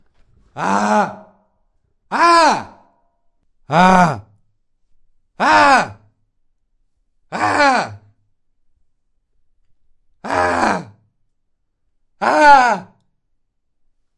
Young Male Screams
Me, male, 25, having a cold at time or recording (in the "getting better" stage). Recorded with a Zoom H2.